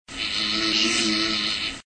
Drawn out Noisy fart
flatulation, snore, frogs, poot, computer, nascar, car, aliens, ship, explosion, flatulence, gas, race, frog, beat, laser, fart, noise, weird